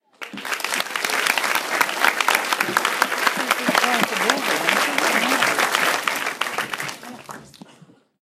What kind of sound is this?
Large crowd applause sounds recorded with a 5th-gen iPod touch. Edited in Audacity.